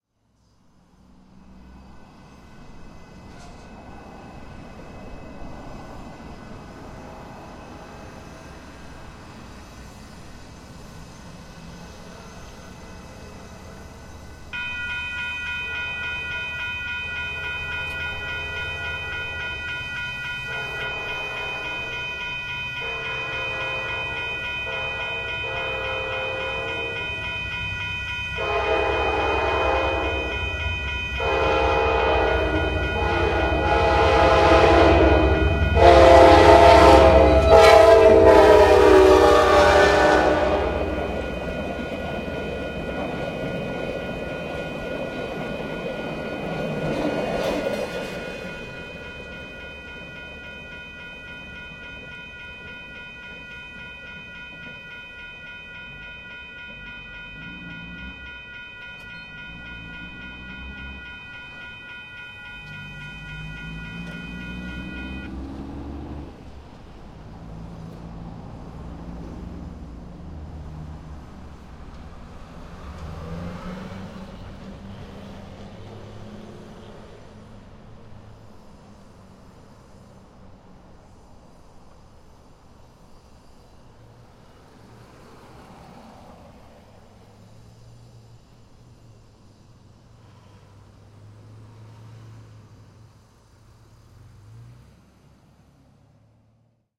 short train close
Short passenger train approaches & passes @ close distance. Recorded w/ internals, in XY, on a Sony D-50.
field-recording, industry, train, whistle